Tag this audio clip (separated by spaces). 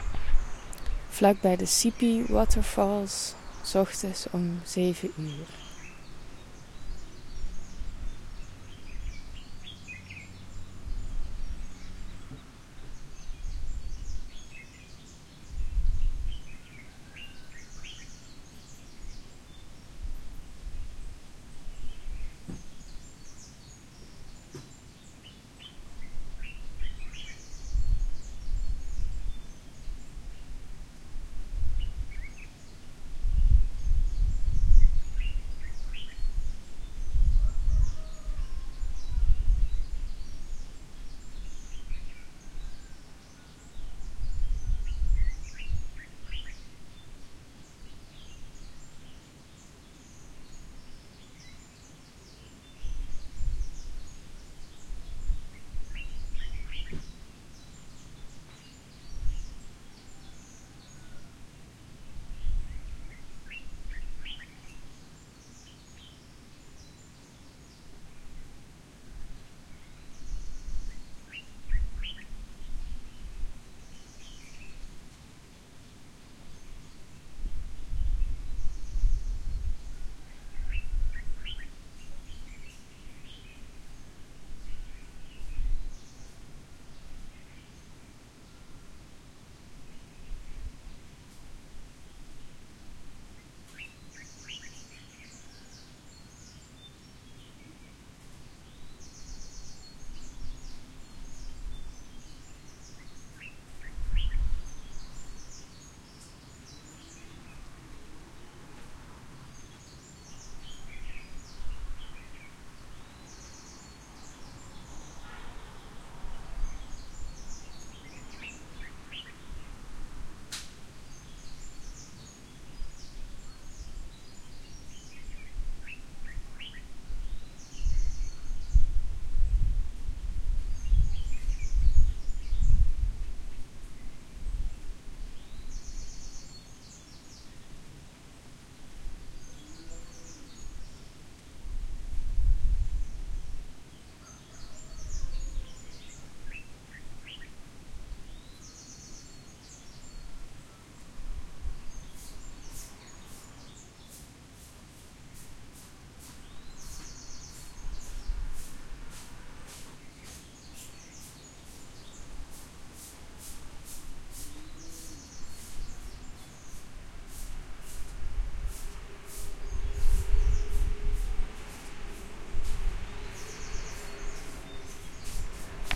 ambiance ambient birds falls field-recording forest mountain nature river sipi stream uganda water waterfall